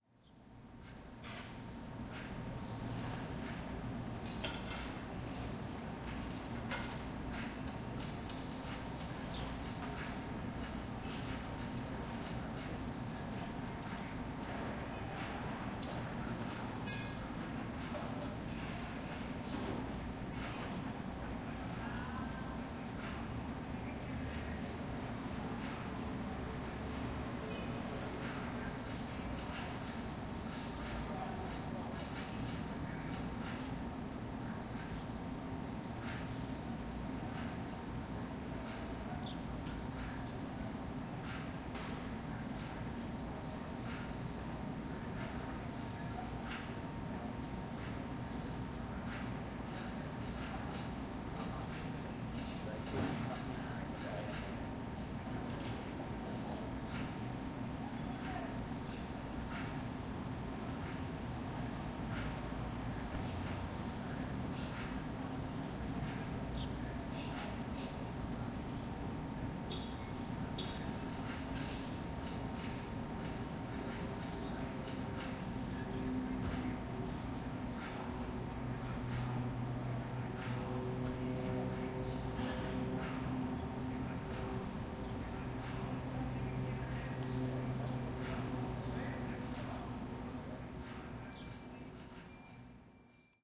South Beach Soundscape 2
A brief cityscape recorded with a Tascam DR-05 at 44.1/24 outside my hotel window in Miami's South Beach area.
Cars, City, Drive, Park, Passing, Public, Road, Street, Traffic, Transportation, Travel